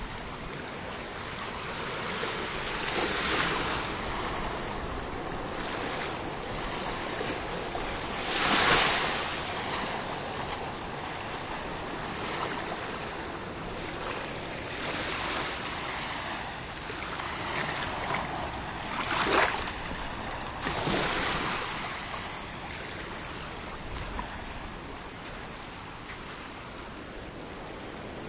3. waves, close recording, beach, portugal
A very close recording of waves coming to the beach. I did it to get more details on the small differenc sounds waves are producing.
beach, portugal, sea, shore, water, waves